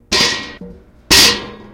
Metal Trashcan lid.Microphone used was a zoom H4n portable recorder in stereo.
ambiance, city, field-recording